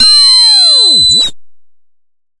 Similar to "Attack Zound-135", but with a longer decay. This sound was created using the Waldorf Attack VSTi within Cubase SX.